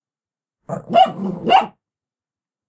Pug Woof 2
Our little pug having a barking session.
bark, barking, dog, dogs, pug, woof